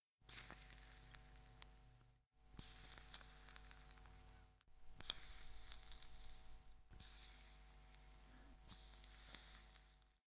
Quit Sizzle Popcorn in Water
Popcorn makes a little sizzling sound when you drop it in hot water. Some mic noise.
~ Popeye's really strong hands.